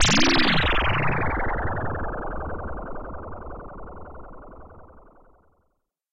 Cartoon - Flying UFO
A flying UFO
alien, cartoon, effect, flies, fly, future, fx, jetsons, laser, sci-fi, scifi, SFX, sound, space, spacecraft, spaceship, takeoff, UFO